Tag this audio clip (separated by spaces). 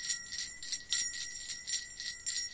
bells ringing small